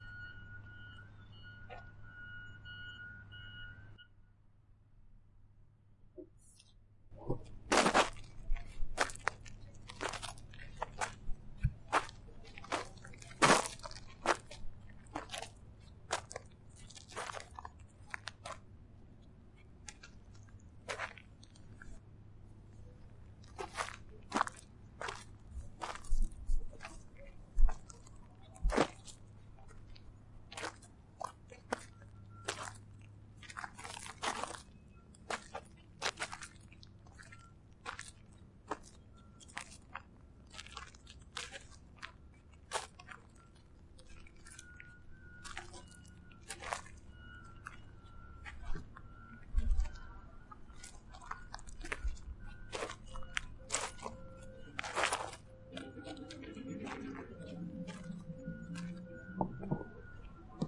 ZOOM0005 TrLR GravelNoiseRed16db
Recorded with a ZoomH5 stock XY microphone pointed down at my feet. This version used a 16db noise reduction.
This is my first upload and attempt at getting gravel footsteps. I'll rerecord soon.
footsteps, steps, walk, walking